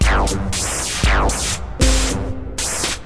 This is an old Boss drum machine going through a Nord Modular patch. One bar at 78BPM.